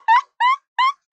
Wiping Glass
Originnaly it was a friend of mine laughting. But whith the right context it totaly can be someone cleaning a window
cleaning, glass, wiping